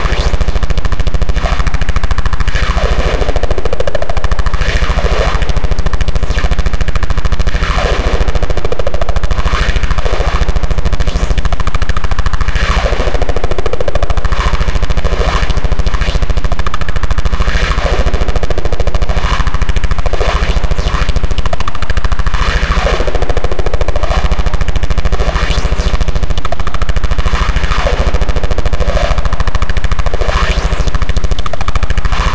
scaryscape motorbrainwashing
a collection of sinister, granular synthesized sounds, designed to be used in a cinematic way.
abrasive, abstract, alien, ambience, ambient, atmosphere, bad, bakground, cinematic, creepy, criminal, dark, drama, drone, effect, electro, engine, experiment, fear, film, filter, future, granular, horror, illbient, industrial, lab, machine, monster, motor